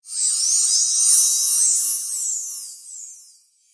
metal leaves
metallic sound generated with FM synthesis